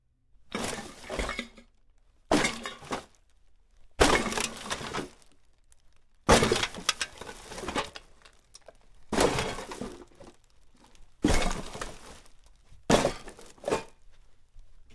garbage bag plastic kick roll
recorded with Sony PCM-D50, Tascam DAP1 DAT with AT835 stereo mic, or Zoom H2
bag, garbage, kick, plastic, roll